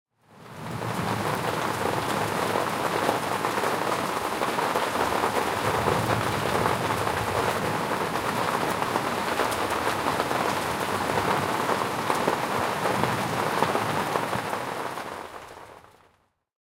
Moderate rain, Next to open window, Short, -23LUFS
Recorded in Budapest (Hungary) with a Zoom H1.
distant, field-recording, lightning, nature, thunder-storm, thunderstorm, window